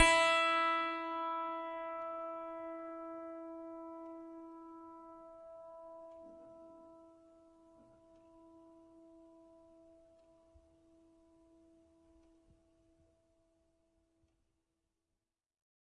a multisample pack of piano strings played with a finger
fingered, multi, piano, strings